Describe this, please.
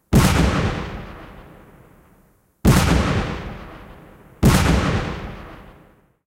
The sound of a real mine explosion, recorded on location at a local coal seam mining site.